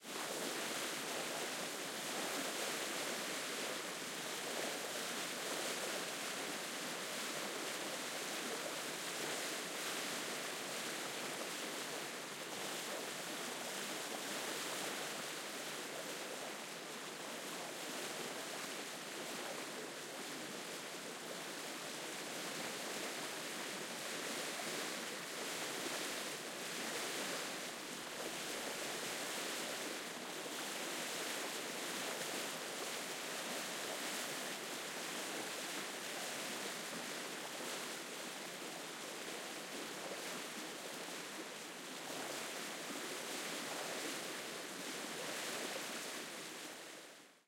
Bow wave of a ~8m sailing boat in calm water, distant micing. No sounds from the sail (could just as well be a motor boat depending on what you layer the sound with ;) )
There is also recording with a more close up perspective of the bow wave.